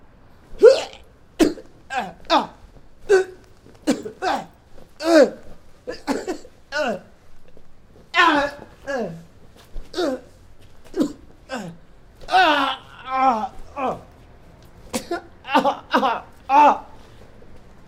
man being hit ouch painful yelling beatdown 1
beatdown, grunt, pain, hit